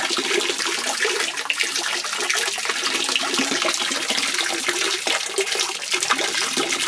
Water from tap
creek, pluming, spring, water